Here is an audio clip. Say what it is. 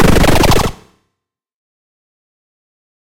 Retro Game Sounds SFX 158
soundeffect, audio, gameover, freaky, sounddesign, shooting, gun, gamesound, effect, sfx, weapon, gameaudio, sound-design, electronic